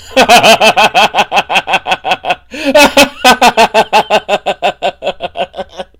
Crazy old man laugh
recording of a man laughing, though not sure at what.
male-laughing, hysterical, laugh